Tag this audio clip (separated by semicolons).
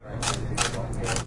cash; cash-register; change; coho; money; stanford